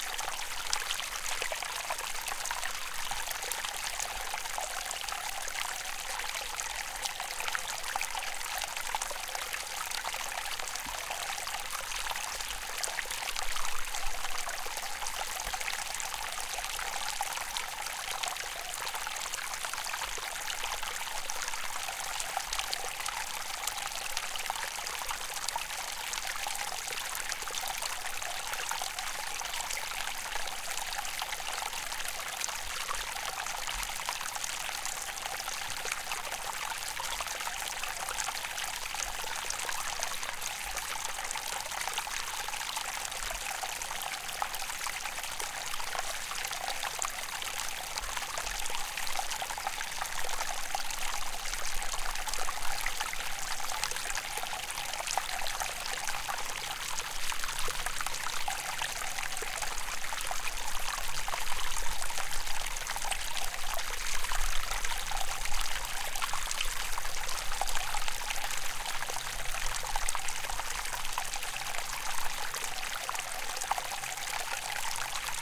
On a hike by a lake, there were several small and cute sounding streams. Each with it's own character. Recorded with a pair of AT4021 mics into a modified Marantz PMD661.